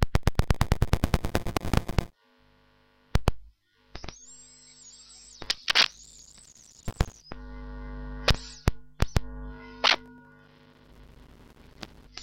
Mute Synth Clicking 008
Small clicking sounds from the Mute Synth.
Actually one of the best 'clicking' samples from the Mute Synth. - Many tiny varied sounds in here, if this is your thing.
Should be good raw material for musical genres such as glitch.
Mute-Synth, click, clicking, electronic, glitch, noise